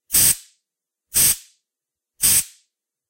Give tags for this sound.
freshner; pressure; duster; hiss

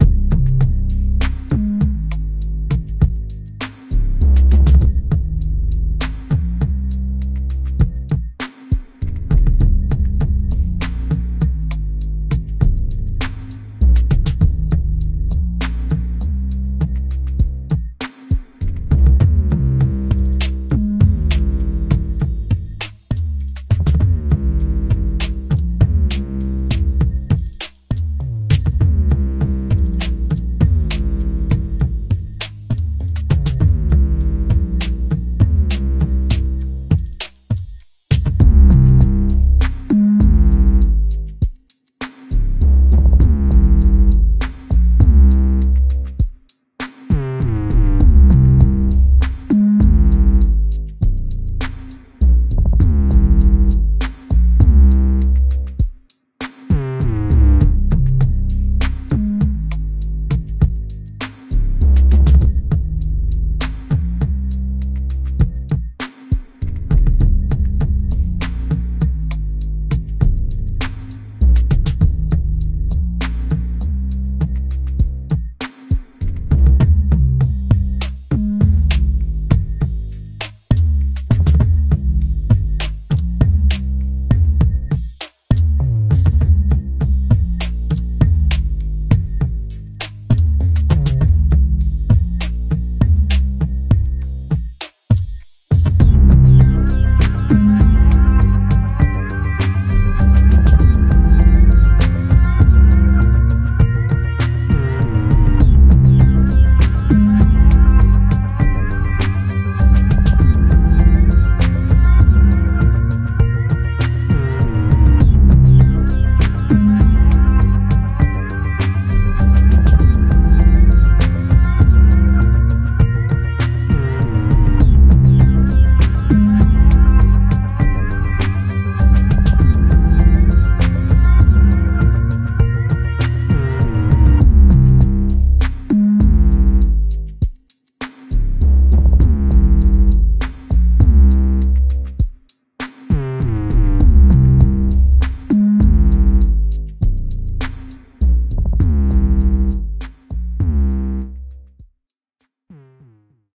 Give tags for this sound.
paradimensional; sinister

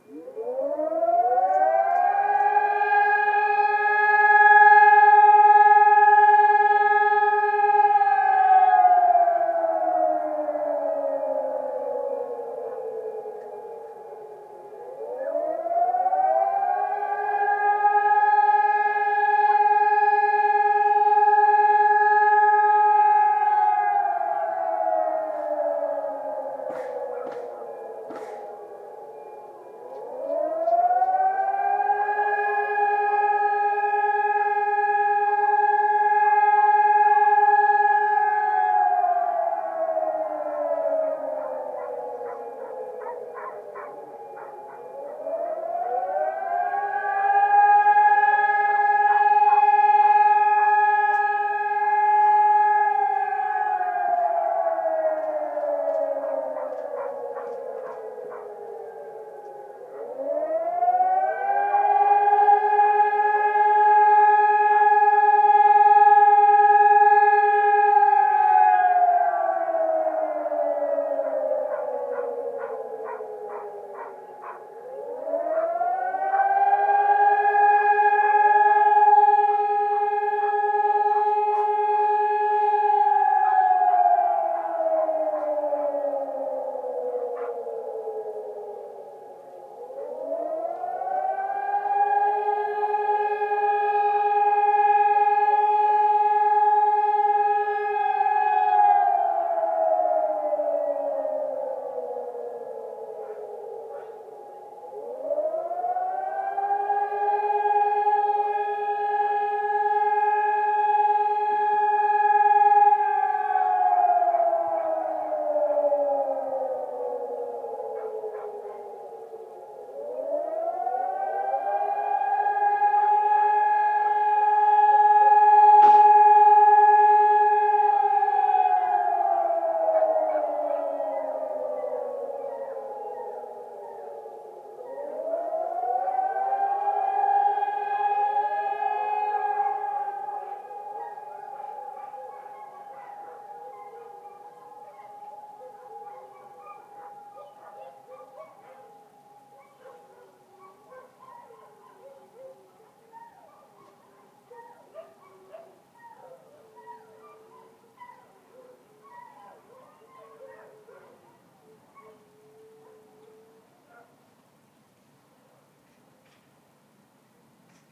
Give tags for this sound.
bombing; air

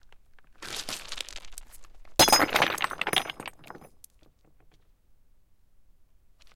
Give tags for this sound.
stone; stones; heavy; falling; large; rock; rocks; glass; throw

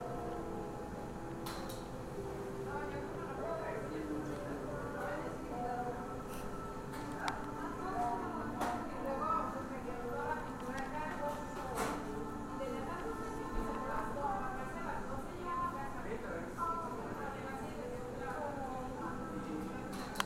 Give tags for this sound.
city; night

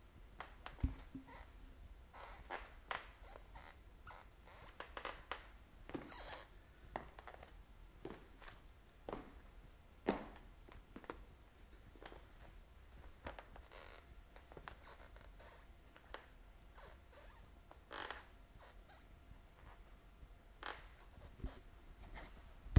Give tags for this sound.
slowly; walking; wood; floor; old

